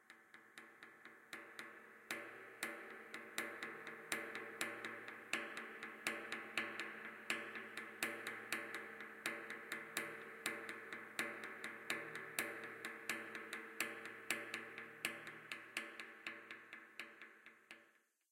propane tank dry 8th note beat
Field recording of approximately 500 gallon empty propane tank being repeatedly struck by a tree branch in a syncopated 8th note pattern about 120bpm.
Recorded with Zoom H4N recorder. For the most part, sounds in this pack just vary size of branch and velocity of strike.
hit, pattern, propane, reverberation, wood